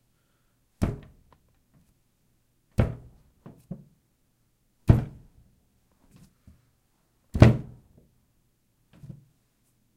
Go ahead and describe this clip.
Arm impact on porcelain sink